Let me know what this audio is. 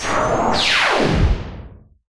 Laser01rev
Laser sound. made on an Alesis Micron.